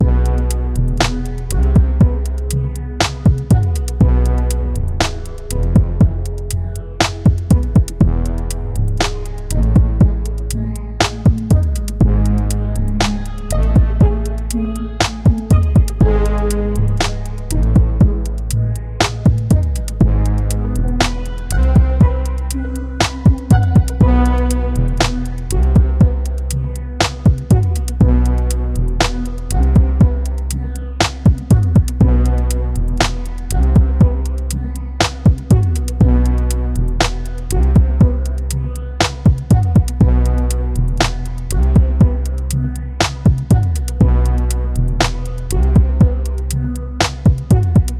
Dark Beat Synth Electro Atmo Ambience Drama Rap Slow Cinematic Music Surround
Ambience, Ambient, Atmo, Atmosphere, Beat, Drone, Electro, Film, Movie, Music, Rap, Slow, Surround